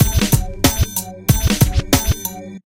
Hip hop loop
Just a small loop that I created in FL Studio 9 (demo). It's supposed to be sort of like a hip-hop beat thing.
loop, hip-hop